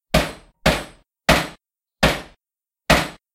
A simple loop of a hammer striking a metallic surface.